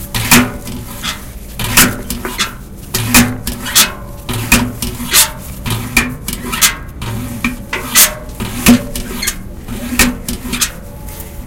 Metal dustbin.
Field recordings from Escola Basica Gualtar (Portugal) and its surroundings, made by pupils of 8 years old.
sonic-snap
Escola-Basica-Gualtar